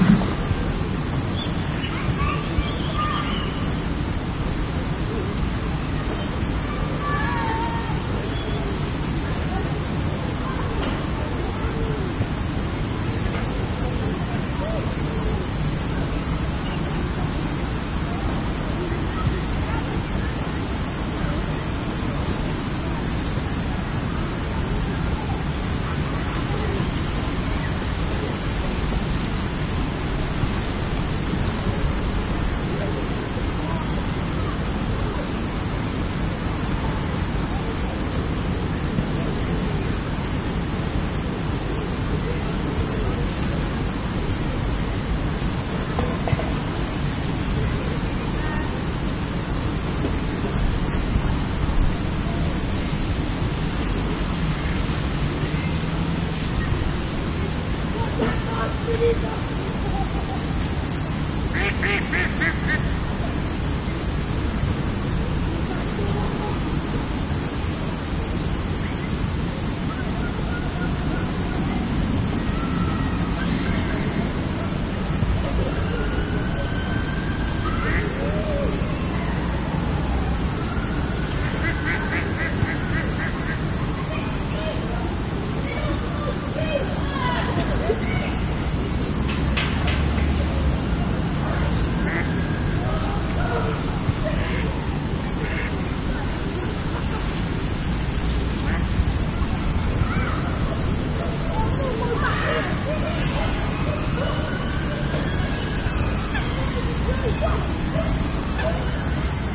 Sitting on a park bench in summer next to a lake in England. Kids playing in the distance, then a police siren in the background. All set against a bunch of ducks.